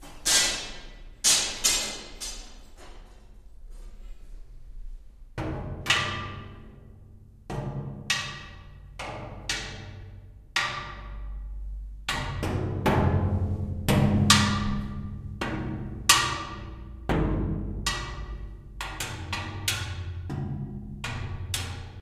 sound of metal tubes and a metal door being hit in an empty room. recorded with Rode NT4 mic->Fel preamplifier->IRiver IHP120 (line-in)/ sonido de tubos de metal y una puerta metálica en un cuarto vacío.
percussion, metal